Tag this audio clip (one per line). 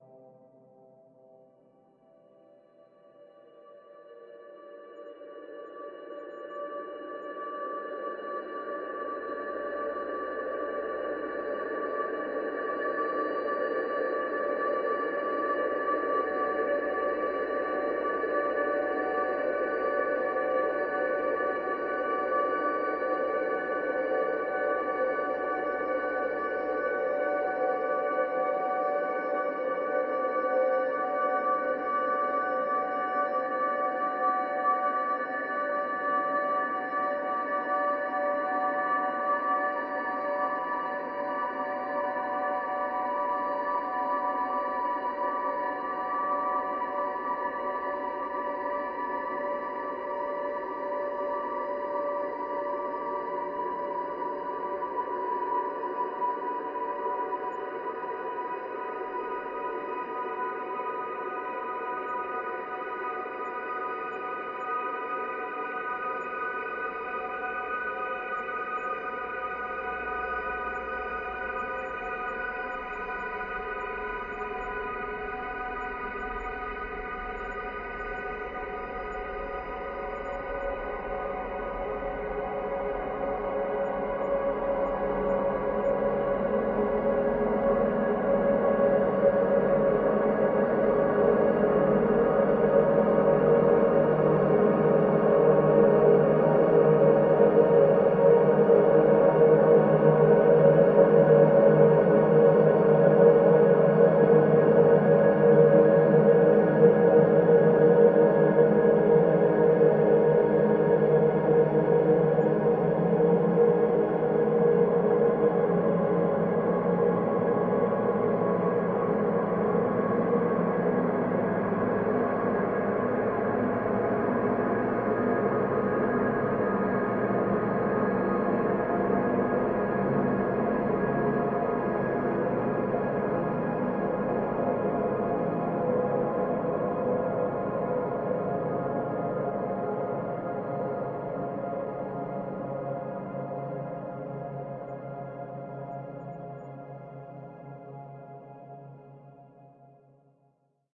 evolving
drone
artificial
pad
soundscape
multisample
ambient